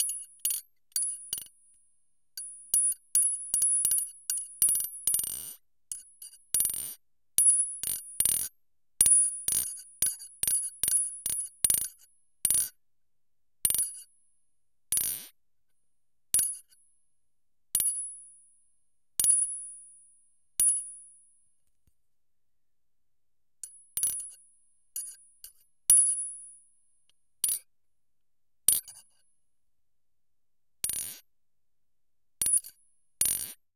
throwing knives - bounce
Bouncing two throwing knives off each other. It sounds kind of like those magnet toys that you throw up in the air and they make a warbling sound when they come together.